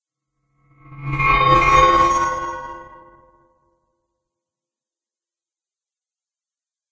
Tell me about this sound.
Not the magic of a smiling baby or the laughter of a child, but more like the magic of the White Wizard Wibbleforth, who is known in our history books for his valient defeat of the Dark Devil Deirdre.
Created digitally using Audacity.
woosh, adventure, rpg, magic, upwards, spell, effect, game-sound, video-game, warlock, cast, magic-cast, spell-cast, flavor, magical, wizard, fairy, magician, sparkle, game, witch, flavour